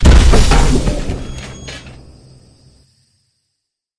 Droid falls over (messy)
My goal with learning sound is creating immersive soundscapes and imaginative moments. I want to create fantastic art, and I can’t reach the peak of my imagination without help. Big thanks to this community!
Also, go check out the profiles of the creators who made and recorded the elements of this sound: